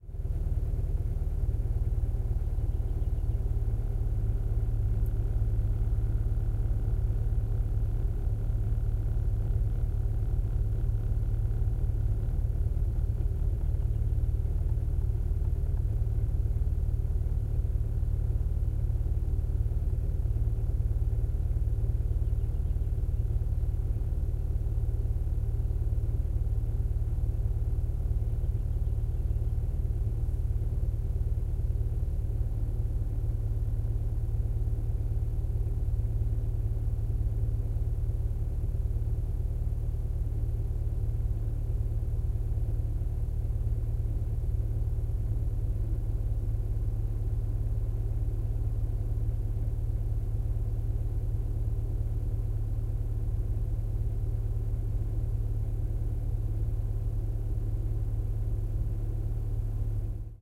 CAR-ENGINE, Volkswagen Golf GLE 1.8 1992 Automatic, engine running idle, interior-0001
Part of Cars & other vehicles -pack, which includes sounds of common cars. Sounds of this pack are just recordings with no further processing. Recorded in 2014, mostly with H4n & Oktava MK012.
car, idle, engine, automatic, vehicle, motor, interior, Volkswagen